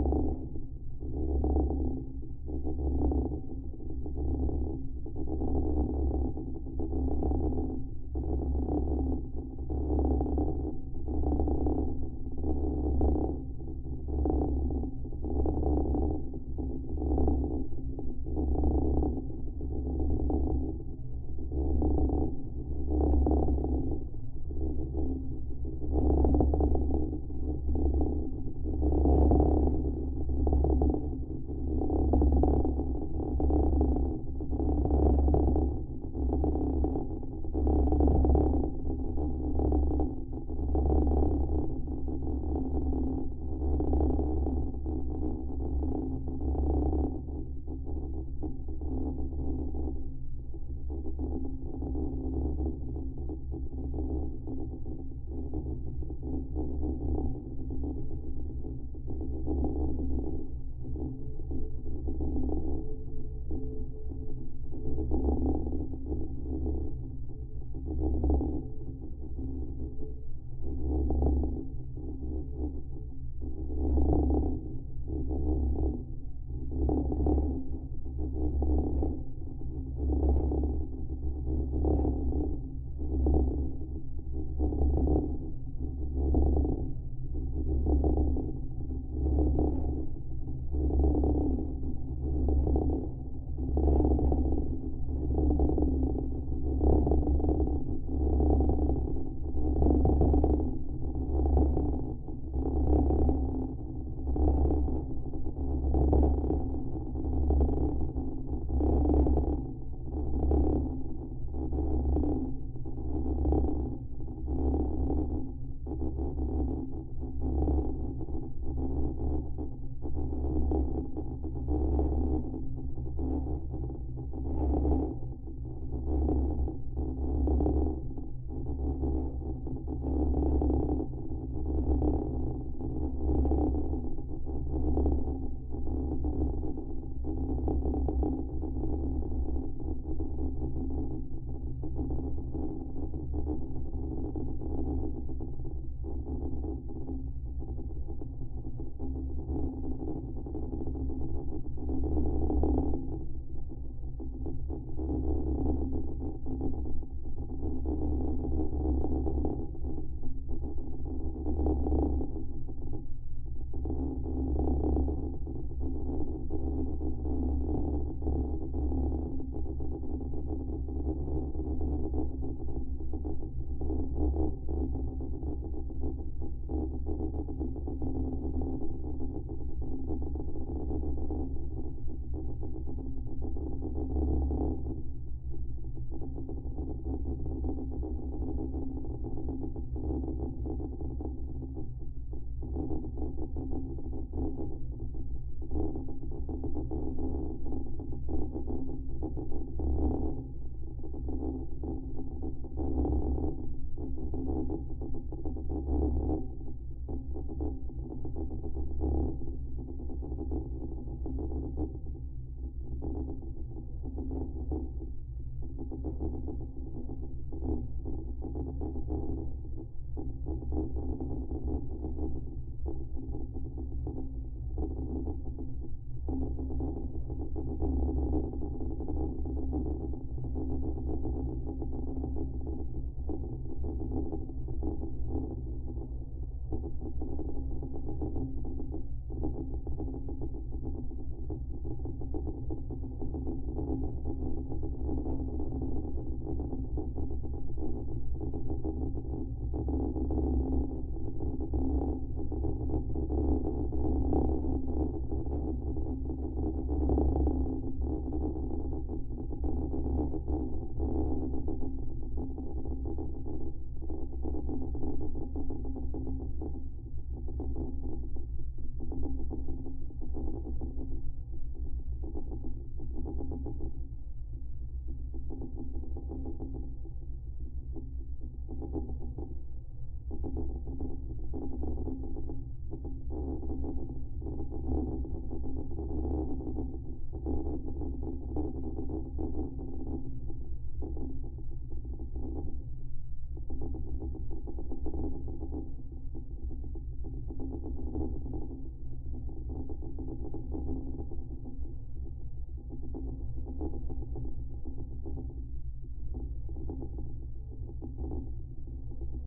(GF) Grounding wire resonating in the wind, dramatic
Geofon was loosely attached to the grounding wire of a powerline post. The hum and noise comes from the intense wind that plays it in an oscillating manner.
Recorded on a MixPre6.
contact, geofon, noise, rumble, wind